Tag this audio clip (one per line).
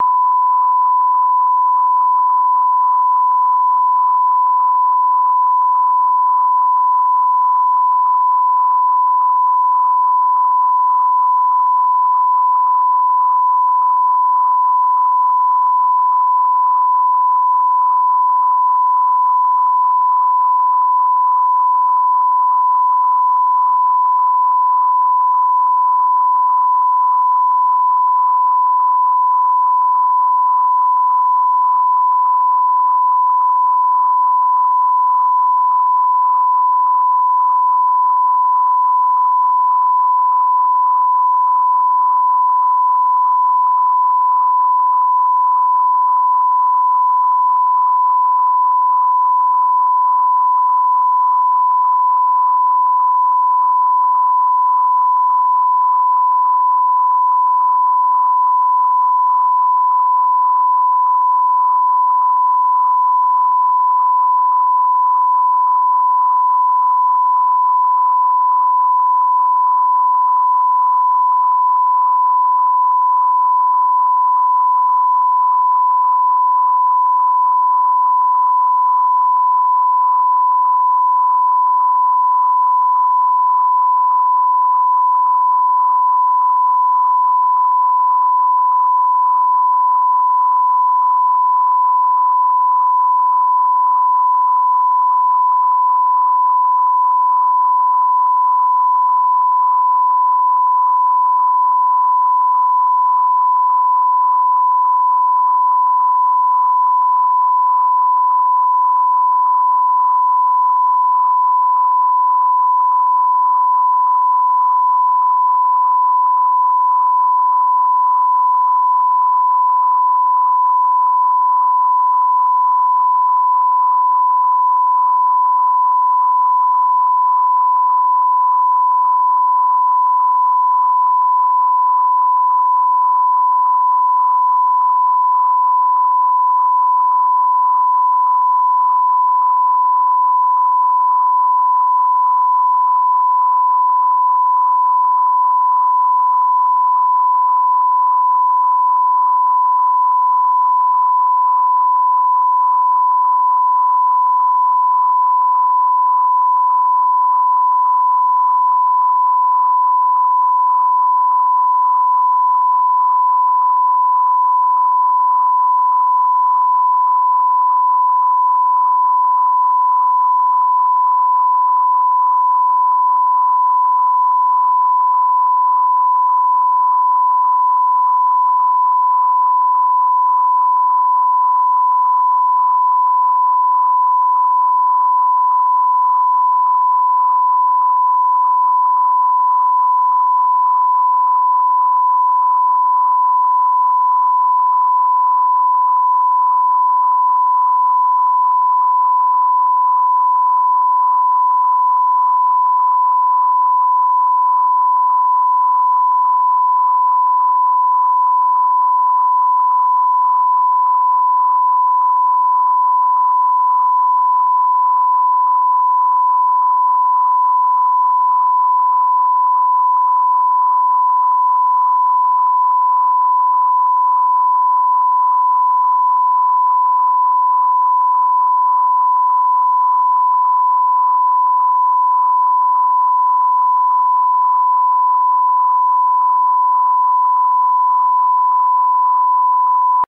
electric
synthetic
sound